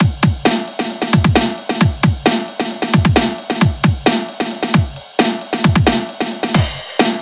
DV Amen Break (133bpm)
I decided to make my own version of Gregory Cylvester Coleman's Amen break @ 133bpm. Please send me a link to any production that you've used this break in. I'd just like to hear how creative you all are with it.
break, drum-break, Amen-break, amen, loop, 133bpm